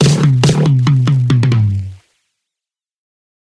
wafb fill acoustic 70 razzo
acoustic fills sound-effect
acoustic, fills, sound-effect